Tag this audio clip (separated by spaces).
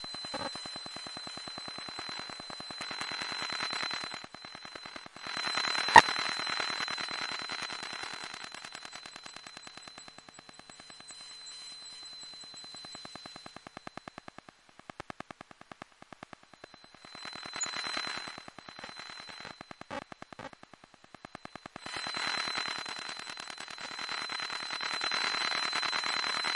8bit elektrosluch electromagnetic-field router